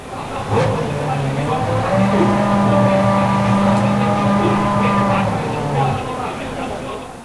F1 BR 06 Engine Starts 2
Formula1 Brazil 2006 race. engine starts "MD MZR50" "Mic ECM907"
adrenaline, car, engine, exciting, f1, field-recording, horsepower, noise, powerful, pulse-rate, racing, v8, vroom